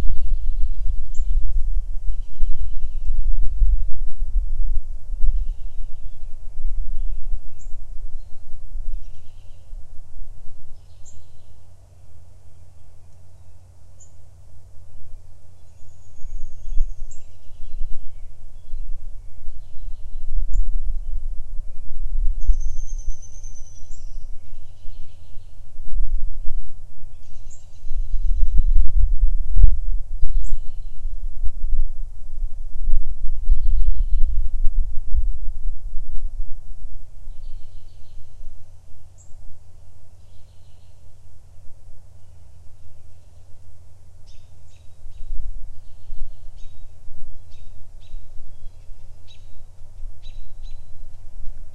backyard birds
another live mic recording in the backyard........